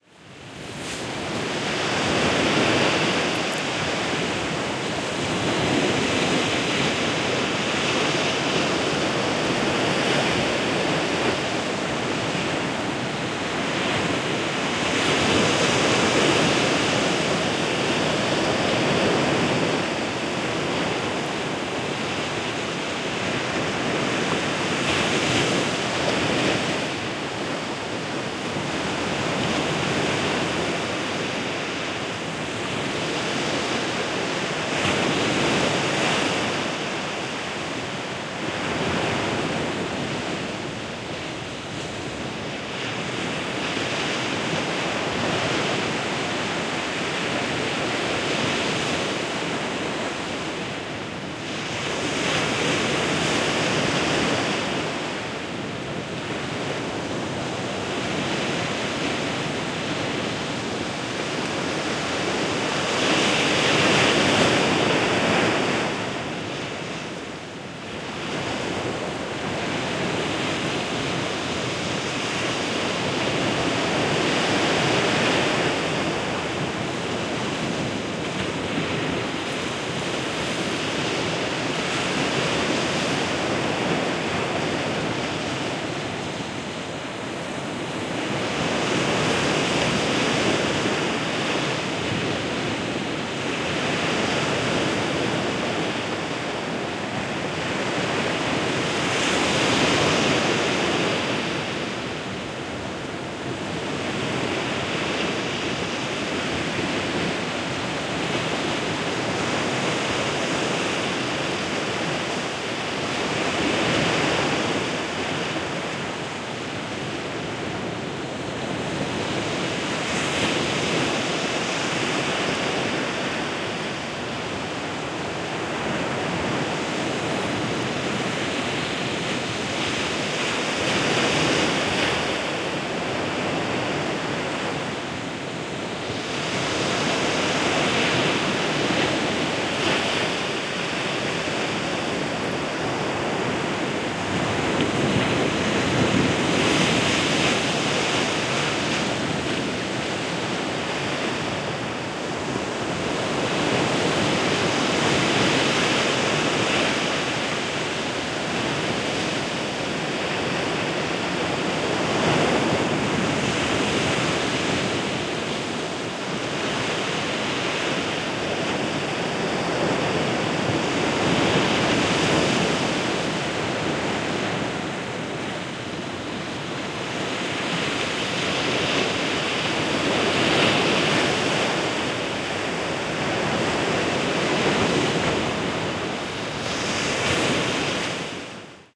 Noise of waves splashing on the sandy beach of Donana (S Spain), at some distance. This was recorded from the top of a 50 m high cliff over the beach, using Audiotechnica BP4025 > Shure FP24 preamp > Tascam DR-60D MkII recorder
Among my surf samples, this is the only one than dont make me feel uneasy, probably because of the distance from which it was recorded.
beach, field-recording, ocean, surf, water, waves